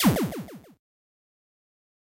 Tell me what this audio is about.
A retro video game explosion sfx.
retro, snes, nes, explosion, nintendo